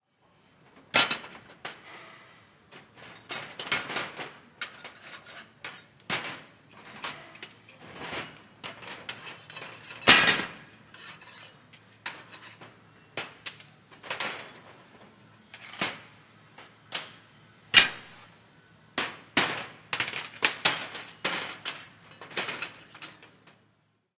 Dig in a pile of metal pieces
industry, field-recording, factory, machinery, metal-movement